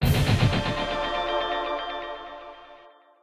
Victory Sound 1
Created this for a video game I'm developing with a bunch of friends. Hopefully someone else will be able to make use of it for a game or something as well!
Produced with Ableton.
orchestral-victory-sound, success, victorious, victory, victory-sound, video-game, video-game-victory-sound, win-sound-effect